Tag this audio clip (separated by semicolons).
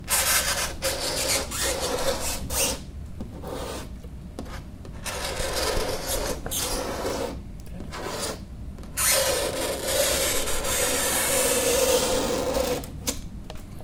Scratch; Chalkboard; Nails